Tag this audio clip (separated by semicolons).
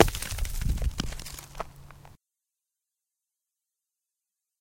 break; pieces; soil